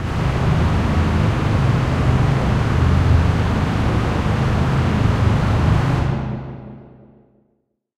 SteamPipe 2 Ambiance C1
This sample is part of the "SteamPipe Multisample 2 Ambiance" sample
pack. It is a multisample to import into your favourite samples. The
sound creates a stormy ambiance. So it is very usable for background
atmosphere. In the sample pack there are 16 samples evenly spread
across 5 octaves (C1 till C6). The note in the sample name (C, E or G#)
does not indicate the pitch of the sound but the key on my keyboard.
The sound was created with the SteamPipe V3 ensemble from the user
library of Reaktor. After that normalising and fades were applied within Cubase SX & Wavelab.
ambient, atmosphere, industrial, multisample, reaktor, storm